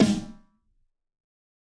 Fat Snare of GOD high tune 030
Fatter version of the snare. This is a mix of various snares. Type of sample: Realistic